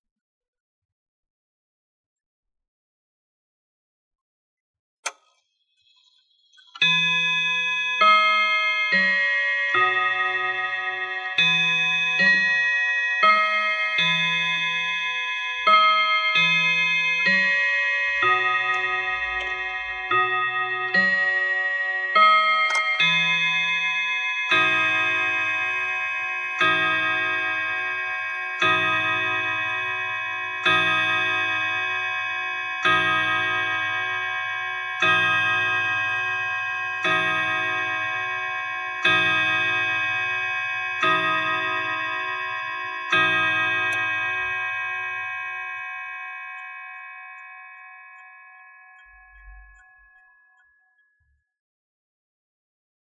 Grandfather Clock Strikes Ten - No ticking
Grandfather clock strikes ten. Roughly edited out the ticking and background noise. If you need a raw file holla at me